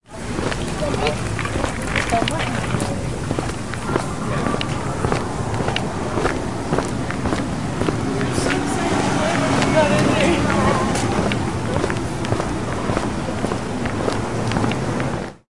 This is a sound recorded during July, 2011 in Portland Oregon.
sounds, sound, soundscape, pdx, footsteps, portland, oregon, city